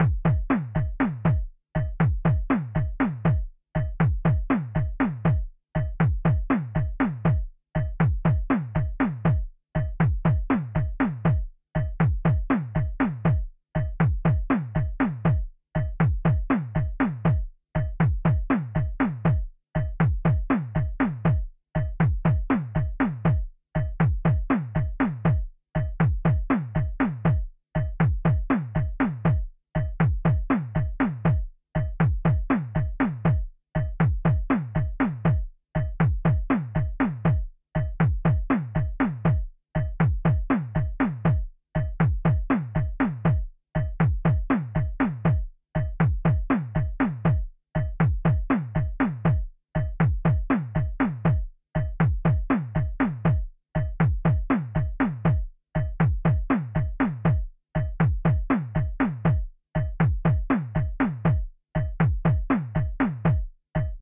8 bit game loop 007 only drums long 120 bpm
120, 8, 8-bit, 8bit, 8bitmusic, 8-bits, bass, beat, bit, bpm, drum, electro, electronic, free, game, gameboy, gameloop, gamemusic, josepres, loop, loops, mario, music, nintendo, sega, synth